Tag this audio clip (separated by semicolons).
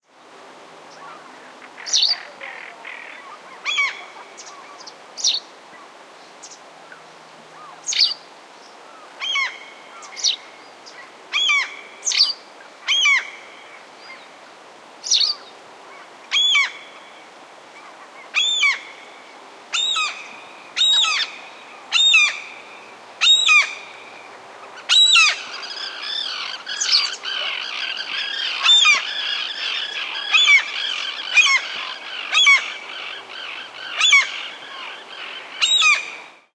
birds; lake; birdsong; bird; nature